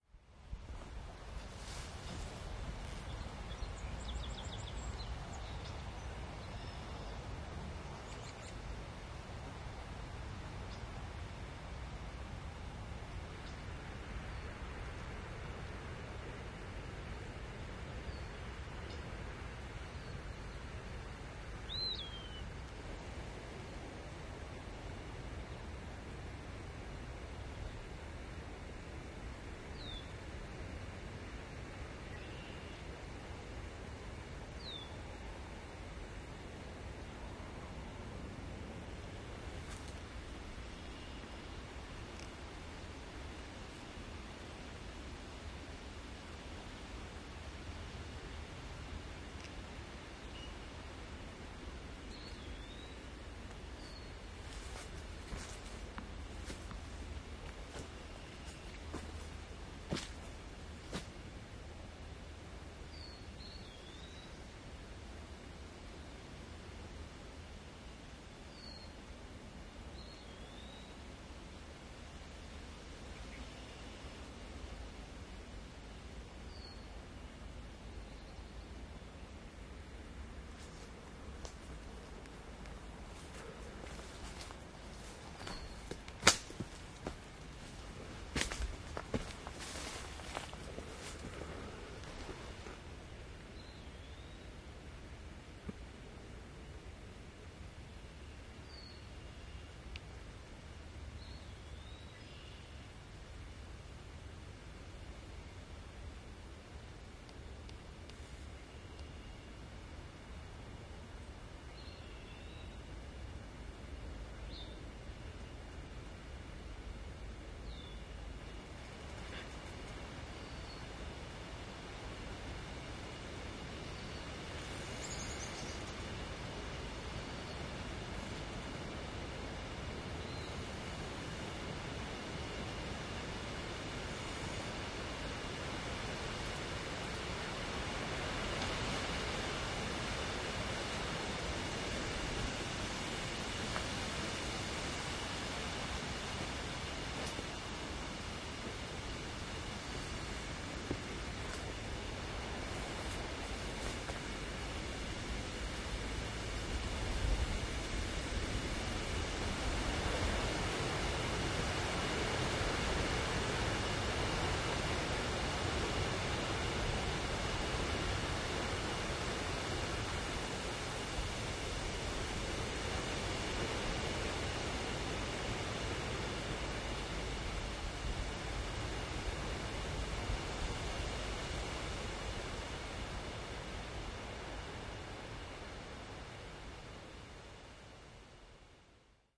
Forest Wind Leaves Trees Birds
birds, forest, leaves, trees, wind